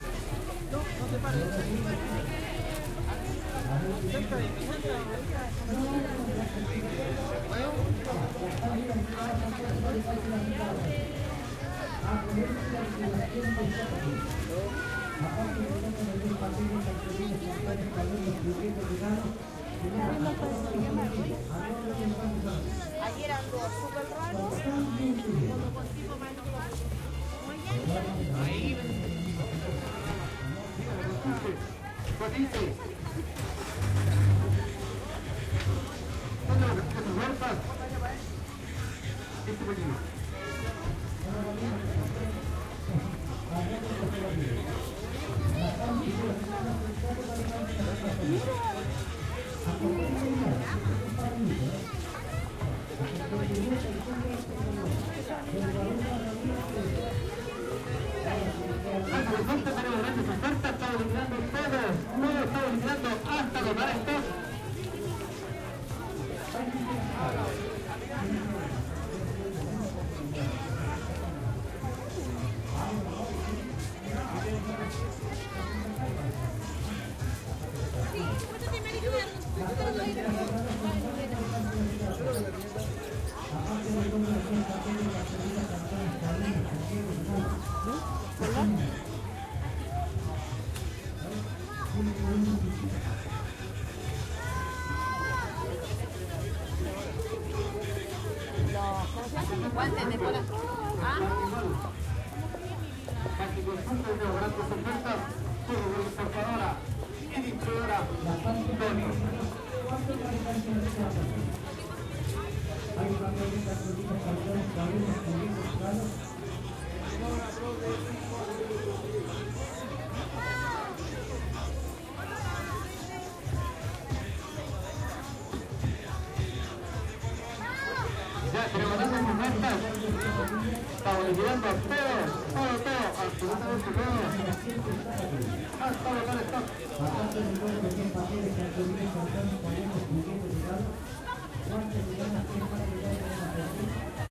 meiggs - 03 pleno paseo meiggs
commerce, paseo, meiggs, trade, santiago, chile, street, retail